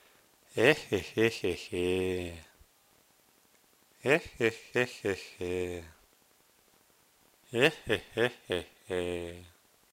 grustnyj smeh
Recording of man's laughter with the subsequent processing ( specially for the audio-book ).
hero, laughter, leshyj, mythology, russian, sad